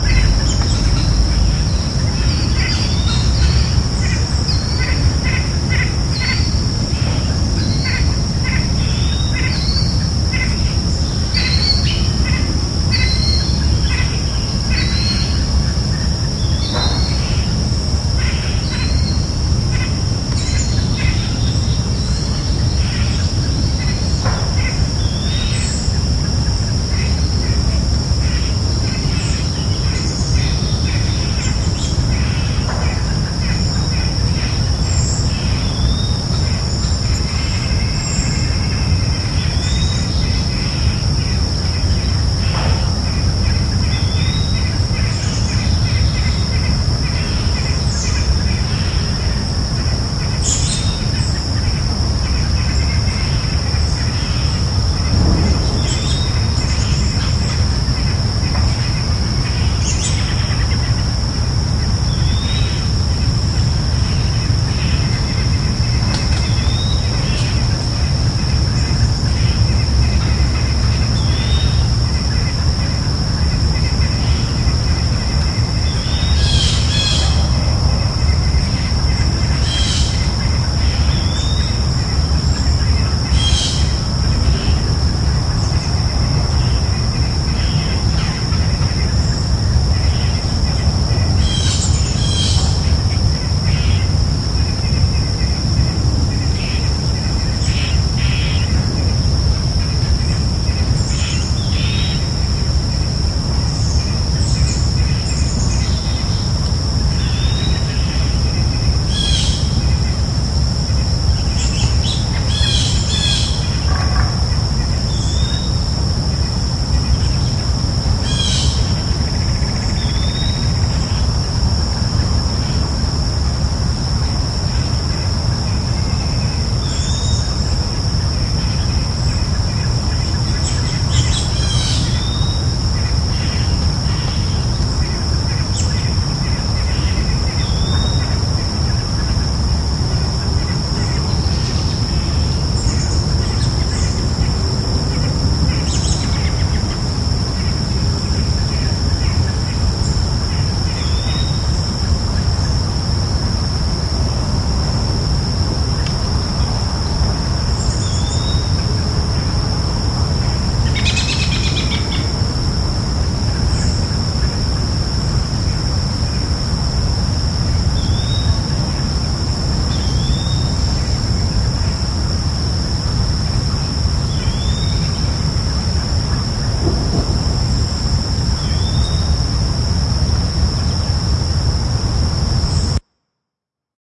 Greenfield Park 08:23 Forest with birds
Taken at the edge of a parks forest. Numerous bird calls, low to no wind, perfectly tranquil!
Ambient, Birds, Forest, Nature, Park